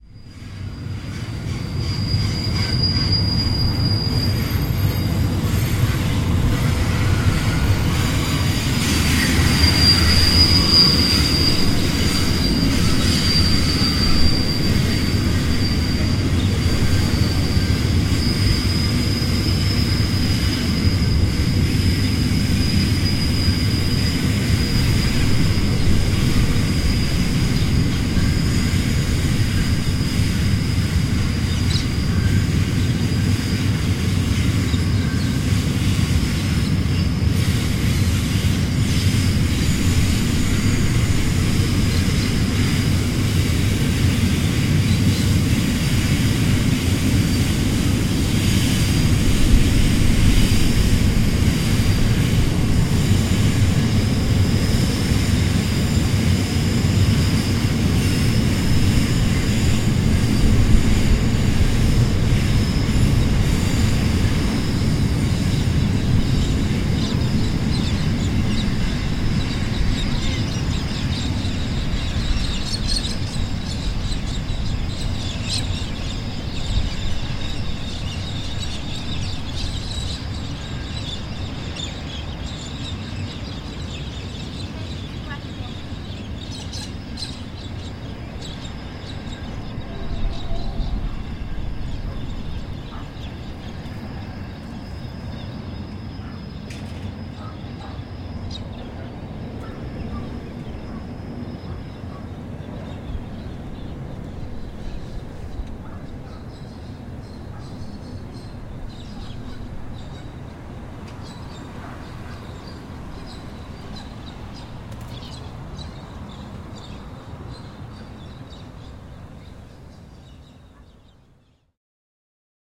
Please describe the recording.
I only just caught this. The sound of a freight train passing through Port Augusta - South Australia,. And then it is gone.
Recorded onto a Zoom f4 with two sennheiser mics arranged in an MS setup.